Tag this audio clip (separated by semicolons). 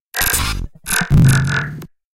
random; glitch